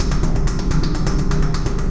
126 Numerology Metal 3 A
Yet another propelling rhythm loop